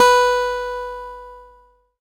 Sampling of my electro acoustic guitar Sherwood SH887 three octaves and five velocity levels
guitar, multisample